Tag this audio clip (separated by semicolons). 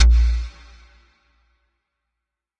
bass; reverb